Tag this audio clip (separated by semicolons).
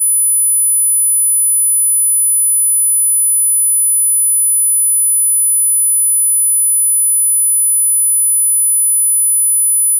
hearing-test; sine-wave; tone